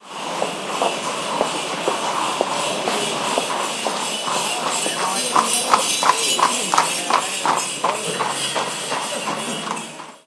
horse-drawn carriage (with bells) passes by, footsteps and neigh
field-recording, south-spain, horse-bells, ambiance, seville, city